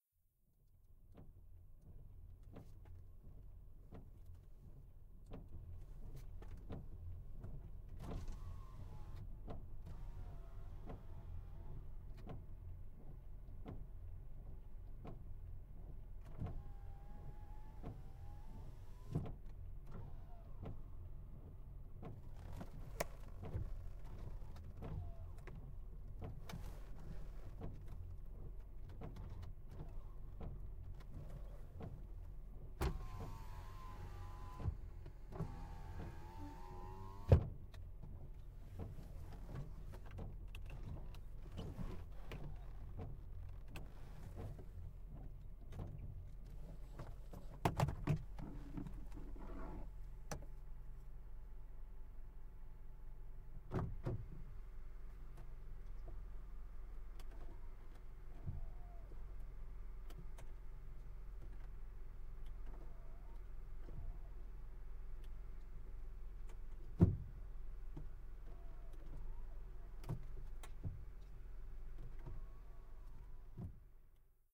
CAR wipers windows Y
Please note to engage END FIRE in decode (the mic was pointed for on Z axis and not compensated for during record). Interior backseat POV. honda civic 2006.
Bformat, car, interior, windshield, wiper